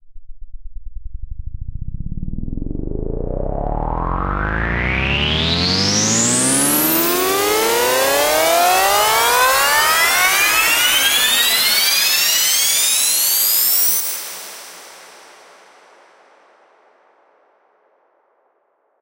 Riser Pitched 07b
Riser made with Massive in Reaper. Eight bars long.
percussion, trance